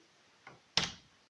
The sound of a door closing